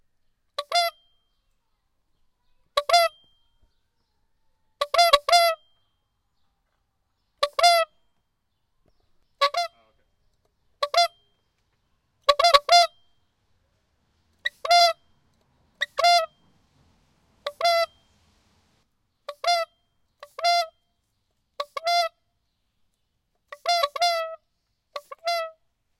bicycle horn toots comedy ish
bicycle, comedy, toots